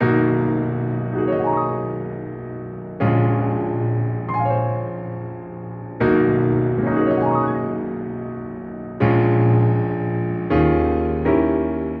Emotional Piano 003 (D#m-80)
Emotional Piano 003 Key: D#m - BPM 80
Chord, Cinematic, Emotional, Film, Hollywood, Loop, Movie, Piano, Progression